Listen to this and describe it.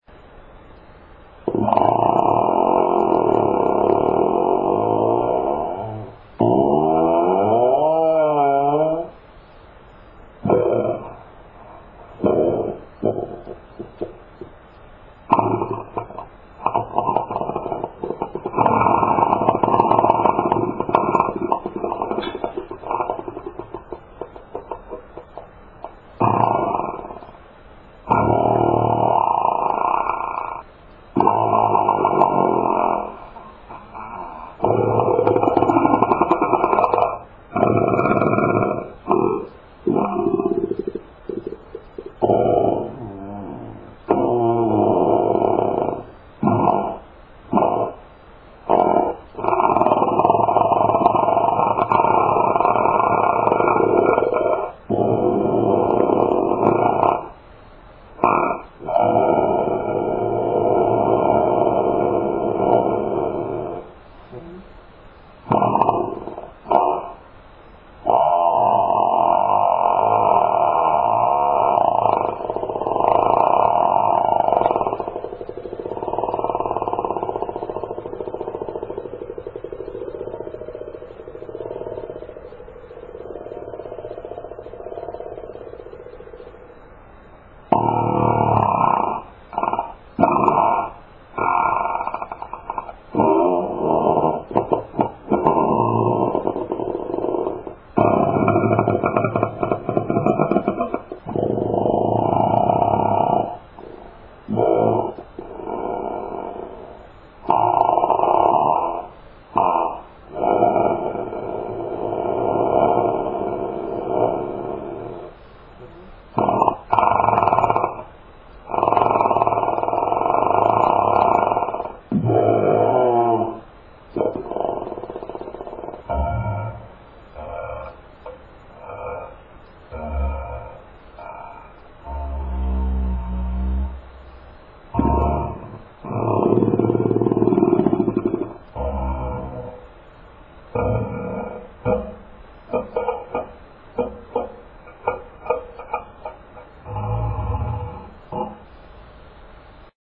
fart montage 3 resampled
farting, farts, flatulate, flatulation